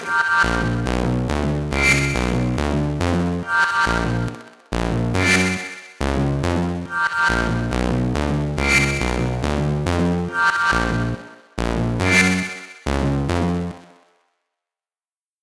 Two measures of squelchy acid bass with piercing synth chords. Created in LMMS, further effects applied in Audacity with Valhalla Supermassive. 140 bpm, A flat Hungarian minor.
303, acid, bass, synth